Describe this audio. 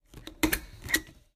Stapler Staple
office, stapler, staple